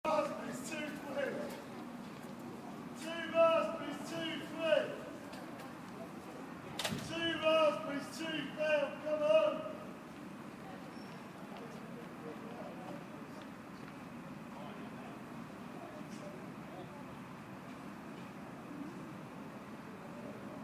open street market